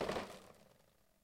Beercrate being moved
beer, bottle, crate, glass